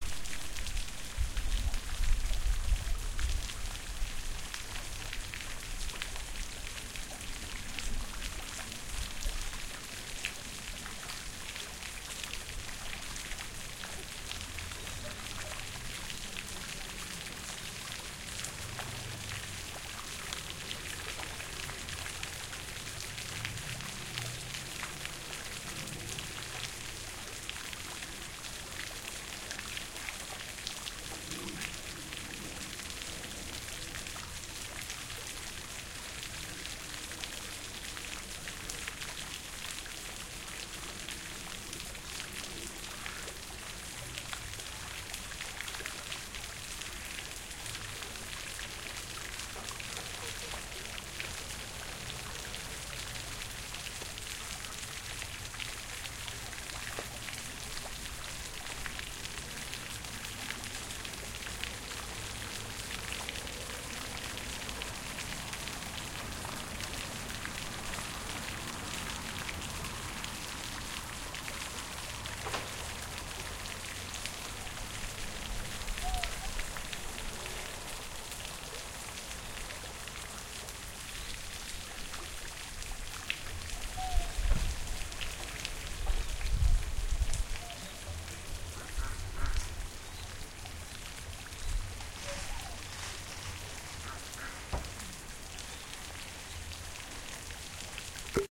Springbrunnen Ententeich
a wall near a pond with dicks
ducks, pond, well